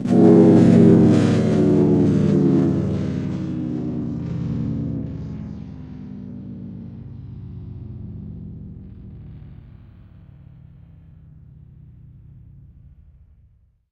Sound from phone sample pack vocoded with Analogx using ufomonoA4 as the carrier. Delay added with Cool Edit. 3D Echo chamber effect added then stretched more and flanger added.